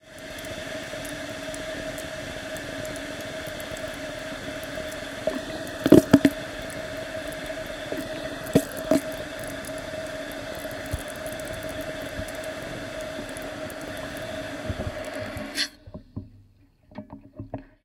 Tinnito - robinet - eau coule - blops - arrêt - C411
Playing with tap, waterpipe and contact microphone.
Zoom F4 + AKG C411
contact-microphone, drone, gurgle, water-pipe